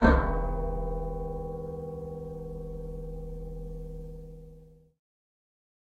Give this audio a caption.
cello bell 2
Violoncello SFX Recorded
Cluster, Hit, Cello, Bell, Violoncello